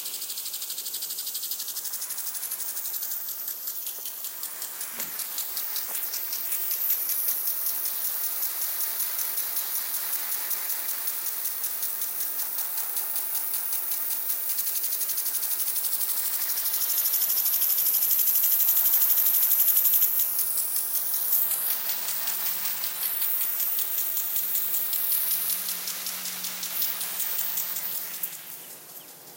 My recording of a sprinkler in my moms garden. If you use it in a song, post it here!
background, garden, watering, water, sprinkler, field-recording